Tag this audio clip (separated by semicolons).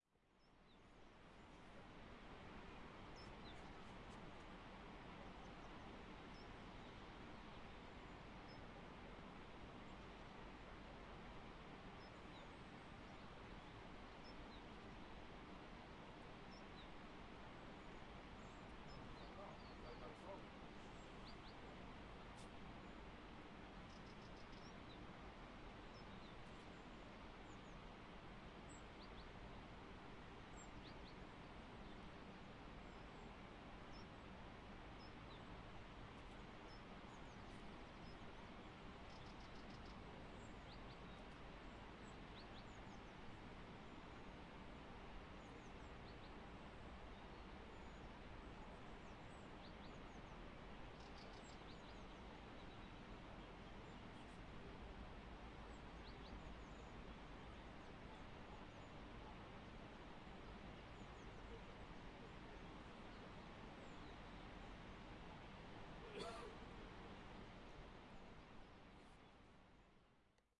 Andalucia; Andalusia; Espana; Ronda; Spain; arboles; birds; landscape; paisaje; pajaros; quiet; rio; river; tranquilo; trees